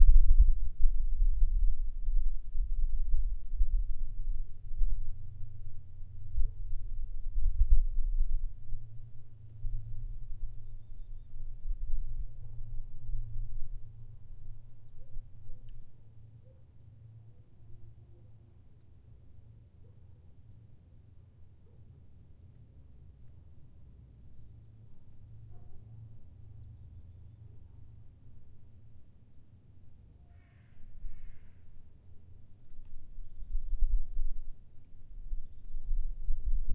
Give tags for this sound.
ambiance background birds cold countryside crow dog field field-recording quiet-town small-town train window winter

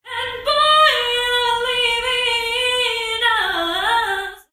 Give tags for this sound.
woman high vocal girl bound english voice pitch female

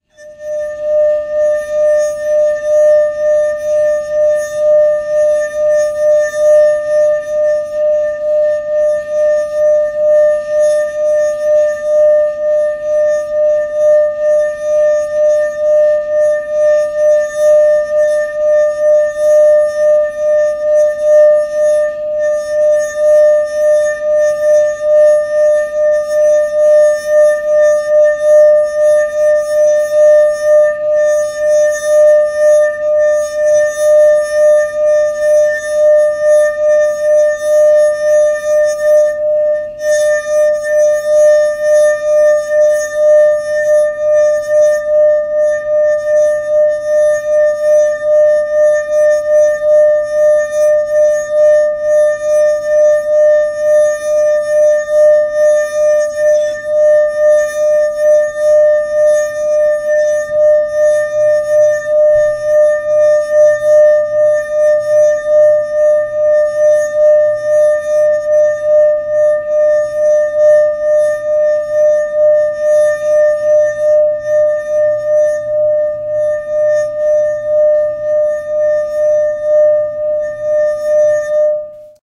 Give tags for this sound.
00-M002-s14 rim rub water wineglass

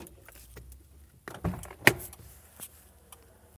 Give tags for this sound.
apagado Apagar carro

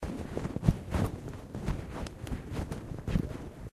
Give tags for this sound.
hiss stove-pipe field-recording

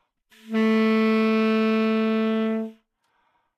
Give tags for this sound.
A3 good-sounds multisample neumann-U87 sax single-note tenor

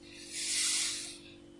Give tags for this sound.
Sand flowing

